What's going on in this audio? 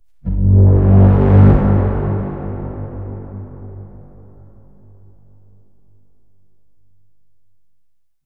Entirely made with a synth and post-processing fx.